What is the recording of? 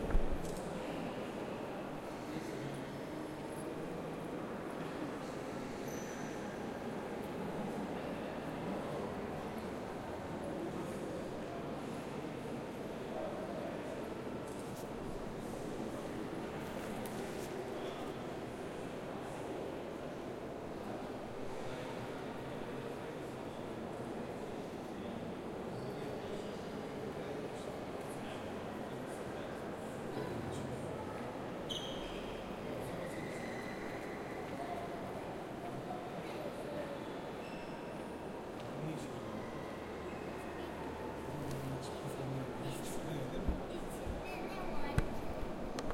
Hagia Sophia Istanbul
Recorded in the Hagia Sophia in Istanbul